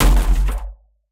The Sci-Mortar shot is basically this sample without the metallic hit and extra distortion.